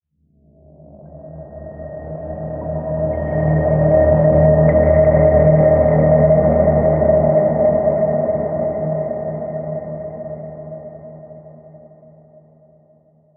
Sci Fi Intro Reveal
THE DARK FUTURE
Dark Suspenseful Sci-Fi Sounds
Just send me a link of your work :)
abstract, effect, Fi, fx, haunt, Intro, Reveal, Sci, sound, Space, Text